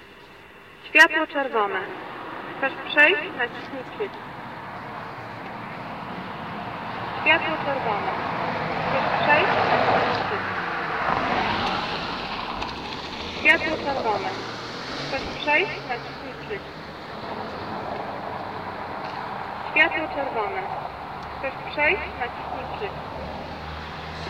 traffic lights message
Sounds recorded at pedestrian crossing.
field-recording, passing-cars, poland